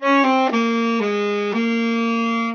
sax-phrase-T5-3
Tenor sax phrase. Sample I played and recorded with Audacity using my laptop computer built-in microphone Realtek HD. Phrase 3/7.
jazz, sampled-instruments, sax, saxophone, sax-phrase, tenor-sax, tenor-sax-phrase